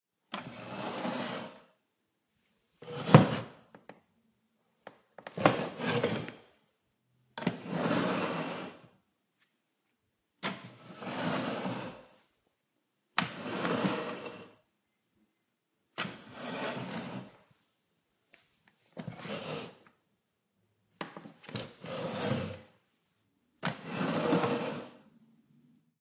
Wooden chair, push in, pull out
Pushing and pulling a wooden chair in and out on a wooden floor
out, push, chair, drag, pull, scoot, floor